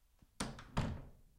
Door Close 1
Door Opening and Closing, 3 mics: 3000B, SM57, SM58
creak, door, handle, slam